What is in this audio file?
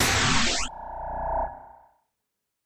From a collection of sounds created for a demo video game assignment.
Created with Ableton Live 9
Absynth
Recording:Zoom H4N Digital Recorder
Bogotá - Colombia